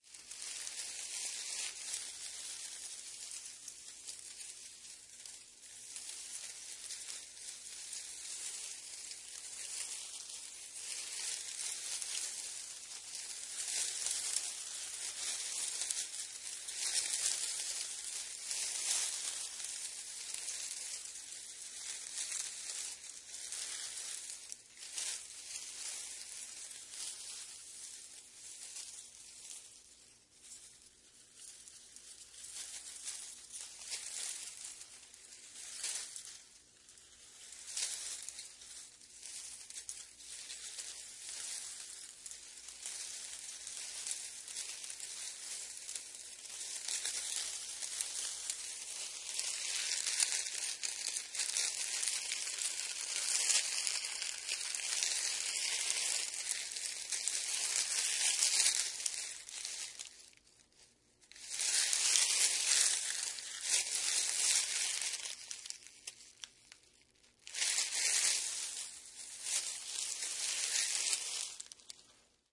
vhs tape
Rustling a bundle of tape stripped from an old VHS cassette.
soft, tape, rustle